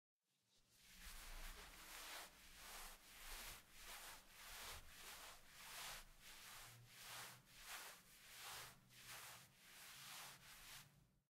walking cloth foley
Mid speed, foley cloth walking.
footsteps, steps